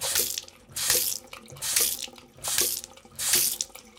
Robinet-Coups rapides
Some water from a tap recorded on DAT (Tascam DAP-1) with a Sennheiser ME66 by G de Courtivron.
tap, water